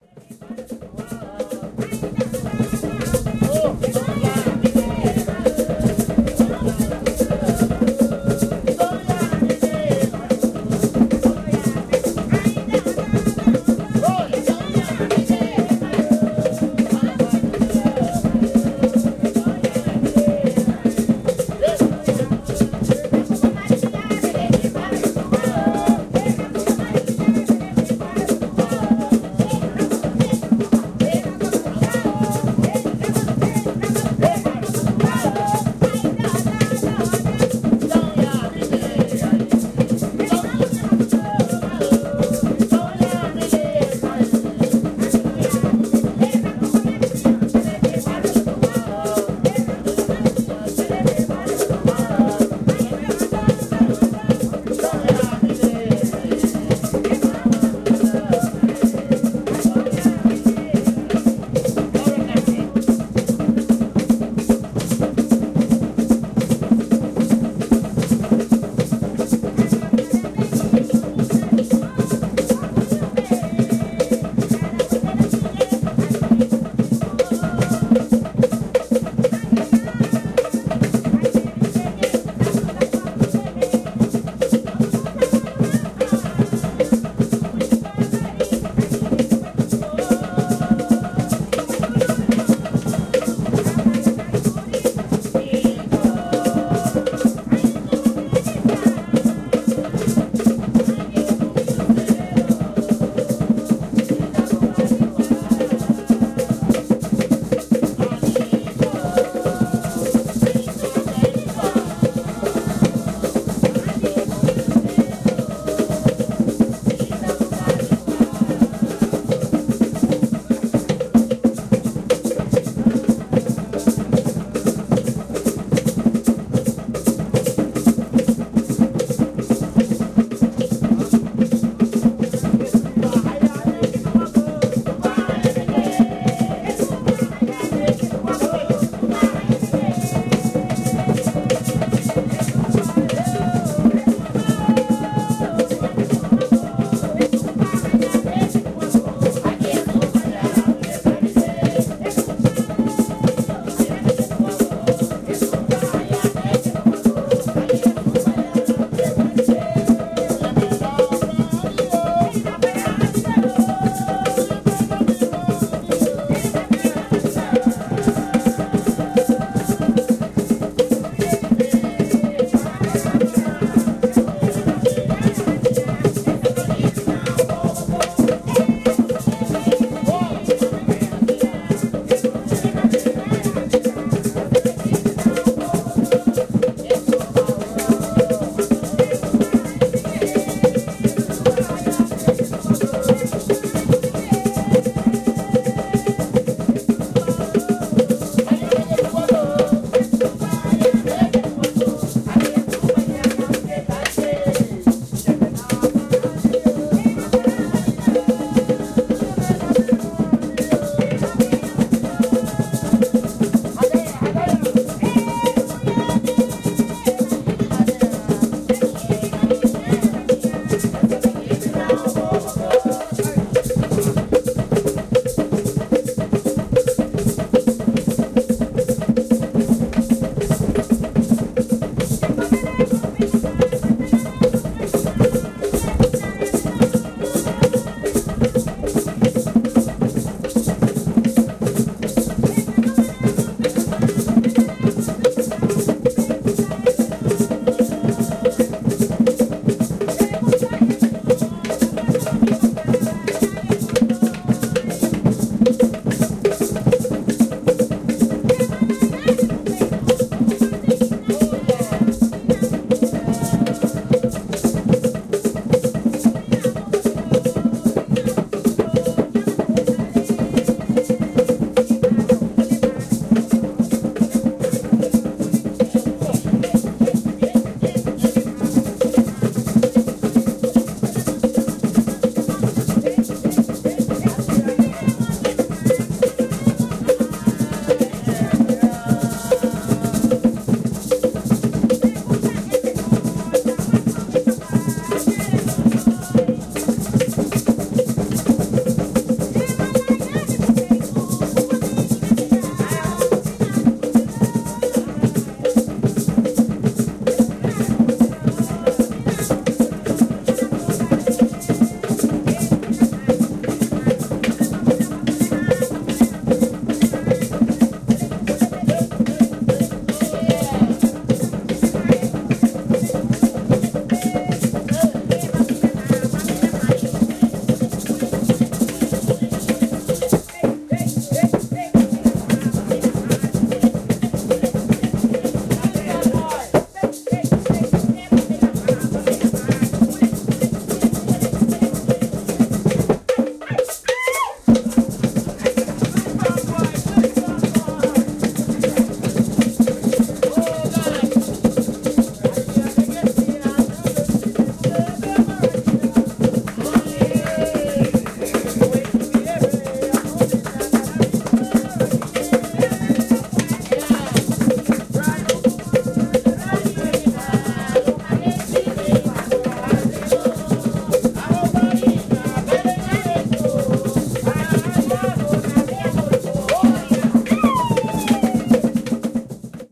Garifuna Drummers 1
Garifuna Drumming 1, Placencia, Belize
African, Belize, Caribbean, Chumba, conga, Cross-rhythm, djembe, drumming, drums, field-recording, Garifuna, hunguhungu, indigenous, loop, music, Paranda, percussion, polyrhythm, primero, Punta, rhythm, rhythmic, Segunda, sisera, syncopation, trance, tribal